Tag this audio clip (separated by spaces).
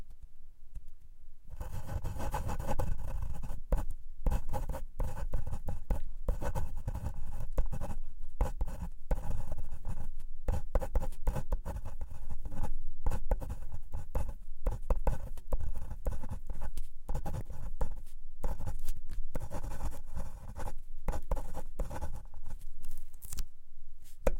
paper writing